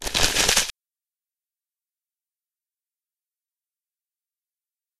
crumpled shit of paper with compressor and speed up effects
paper, shit